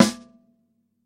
Unprocessed snare drum sample (3 of 4) which was created during the last recordings with my band. Recorded with a dynamic microphone. I would describe the sound as a "rock snare which wants to be a funk snare".